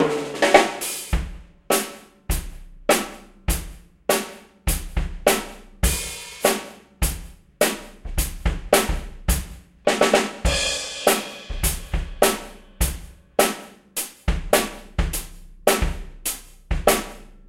Rock beat loop 18 - 1-4 rock and fill - REMIX 1
Made the kick lower in fq and tried to make the drums sound more 60's soul.
remix, drum, soul